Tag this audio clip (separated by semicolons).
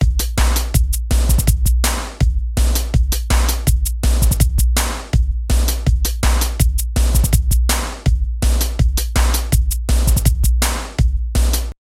bass; beat; dance; drum; dubstep; electro; electronic; loop; loopable; realistic; remix; synth; tamax; techno; trance